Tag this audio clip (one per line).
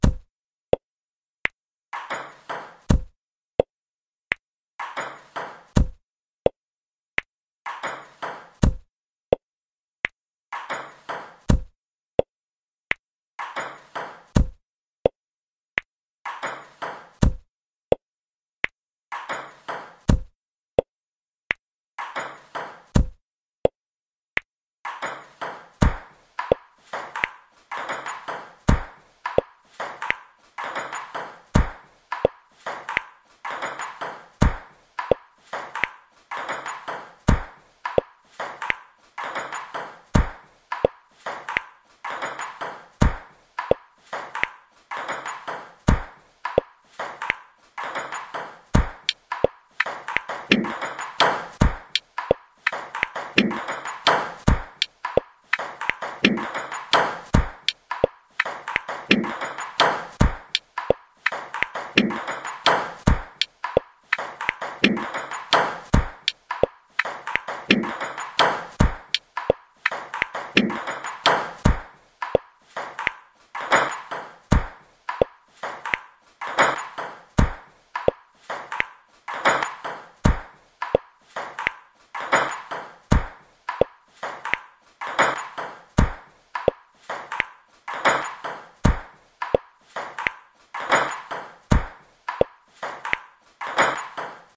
paddle
puddle
spit
BPM
Ping
hip
pedal
game
beetle
waddle
MC
Pong
loop
rap
poodle
beat